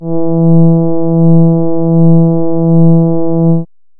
Warm Horn E3
An analog synth horn with a warm, friendly feel to it. This is the note E in the 3rd octave. (Created with AudioSauna.)
warm, synth, horn, brass